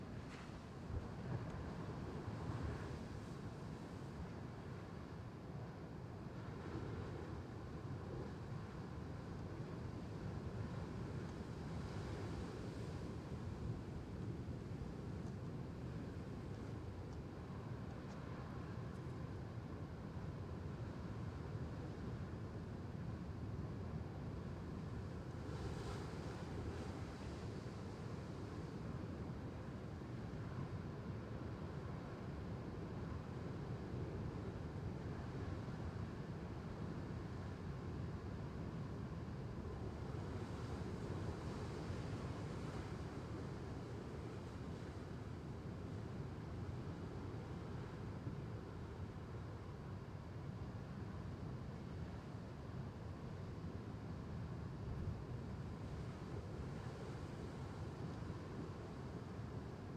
MUXIA LIGHTHOUSE XY
Short recordings made in an emblematic stretch of Galician coastline located in the province of A Coruña (Spain):The Coast of Dead
ocean, lighthouse, sea